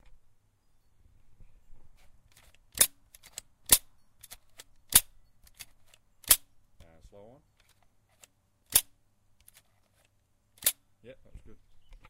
nailgun firing without nails.